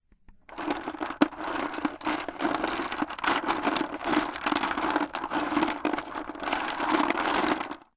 Sifting Through Bolts
Sifting through a box of 2 inch bolts in a tool kit. The bolts were fairly thick and I was searching through just one box of them by hand and letting them fall back onto one another.
aip09, bolts, clink, clinking, hardware, metal, sift, sifting, tool, tool-box